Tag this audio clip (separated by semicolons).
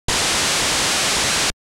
White; noise; tracker